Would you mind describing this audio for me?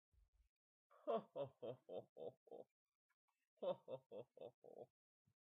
risa feel like a sir
laugh, risa, sir